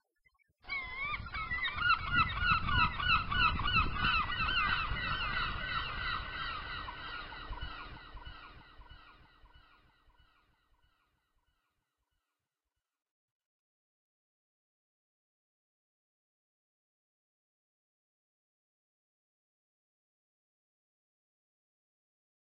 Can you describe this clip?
seagull echo 140bpm
Seagulls at Whitby, with a long delay at 140bpm
chirping, calling, birds, sea, ocean, trippy, loud, bird, whitby, echo